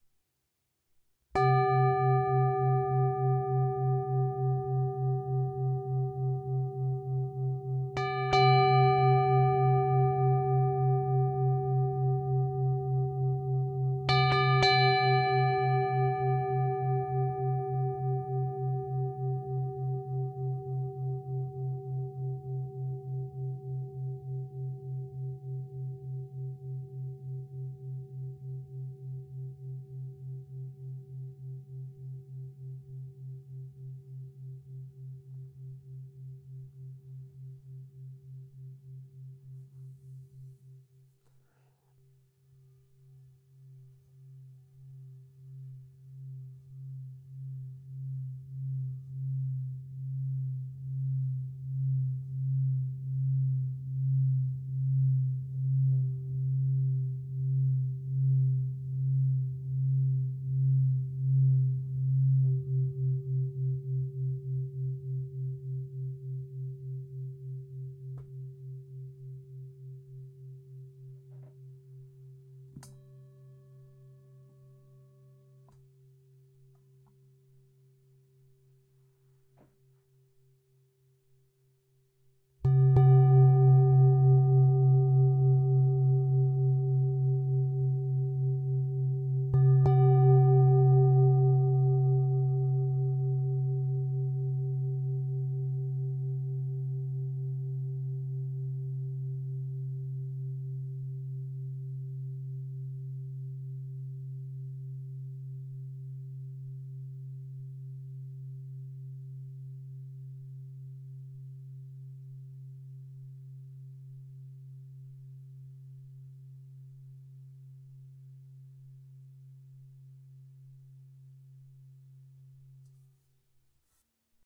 Sound sample of antique singing bowl from Nepal in my collection, played and recorded by myself. Processing done in Audacity; mic is Zoom H4N.
bowl, bronze, strike, percussion, tibetan-bowl, ding, clang, metal, drone, chime, metallic, hit, bell, meditation, harmonic, singing-bowl, gong, ting, brass, ring, tibetan